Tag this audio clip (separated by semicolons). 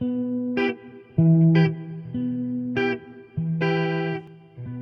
electric; guitar